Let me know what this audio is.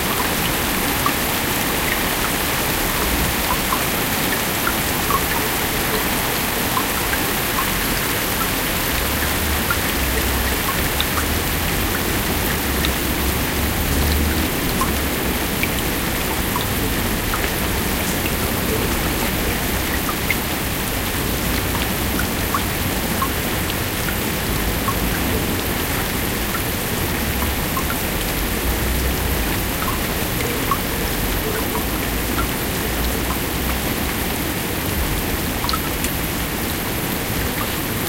Rain on pond
Rain drops hitting a pond during a rainstorm.
ambient, atmo, field-recording, pond, rain, rainstorm, weather